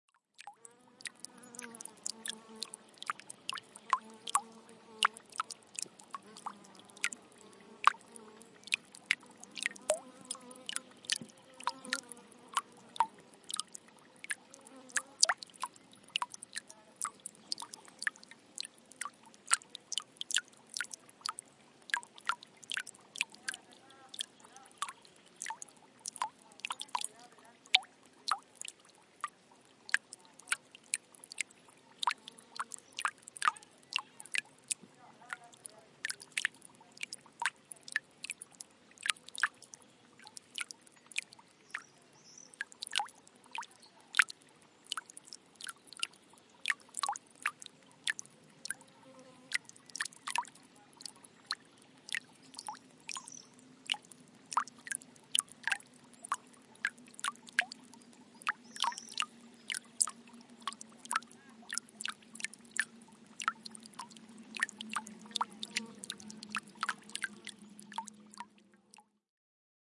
Slow Dropping Water 5
Single small fountain recorded with a Zoom H4